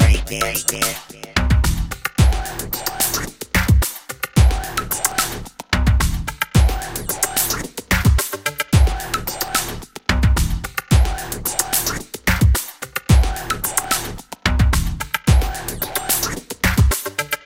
Break it Loop 110 bpm
Electro beat with robot voice sample. 8 Bars. Loop away!
Chill, Electro, Loop, Minimal, Sample, Techno, Trance